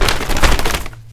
a grocery bag being shaken
bag, paper